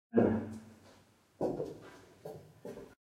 steps in an empty room